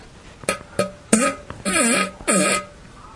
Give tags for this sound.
car; nascar; explosion; laser; beat; noise; flatulation; space; fart; aliens; poot; flatulence; gas; weird

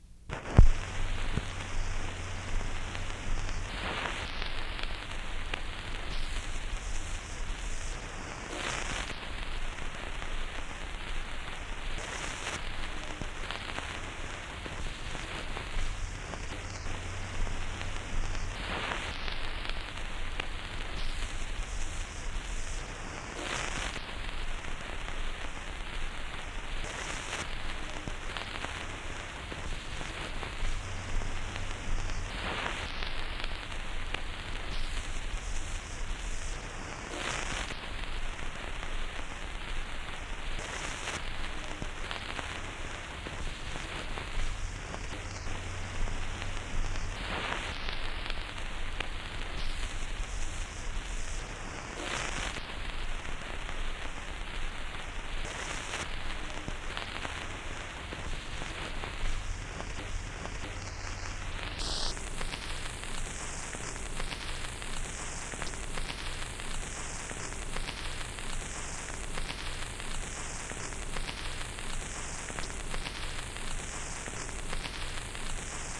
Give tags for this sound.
78; effect; record

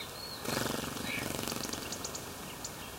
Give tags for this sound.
south-spain; fluttering; winter; nature; air; wings; birds; chirps; field-recording

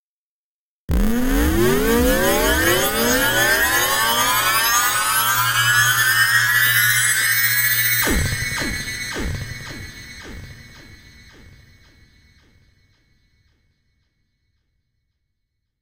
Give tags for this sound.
radioplay; fx; sfx; fall; electronic; instrument; dj; soundeffect; send; stereo; jingle; music; effect; chord; intro; slam; dub-step; loop; noise; interlude; deejay; riser; broadcast; instrumental; trailer; podcast; mix; imaging; radio; drop

